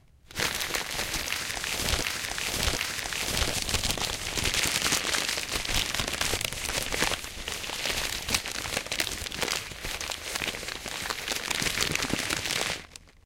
rustle.paper 4
recordings of various rustling sounds with a stereo Audio Technica 853A
paper, tear